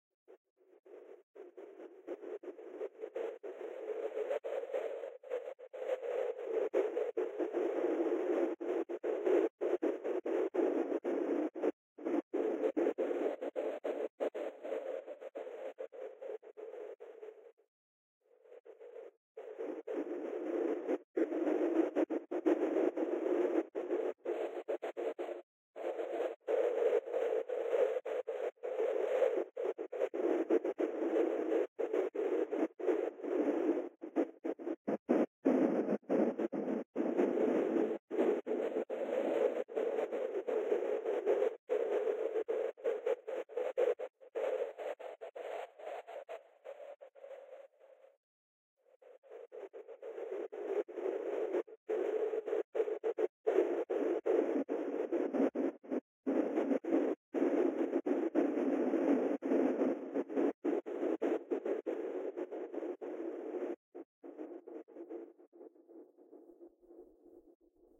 Fragmented Wind Sound
Wind sound mixed with Reaper effects. The effect is that of a fragmented sound.
Wind, Fragmented, Mixing, Noise